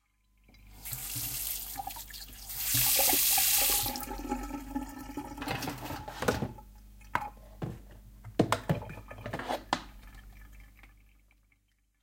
Washing up 4
Sounds of a tap running, with dishes cutlery being swished around in a metal sink filled with water.
Recorded in March 2012 using an RN09 field recorder.
Washing, tap, tap-running, running, sink, Washing-up, Water, Dishes